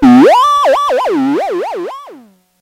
Crazily pitched note hit. Recorded from a circuit bent Casio PT-1 (called ET-1).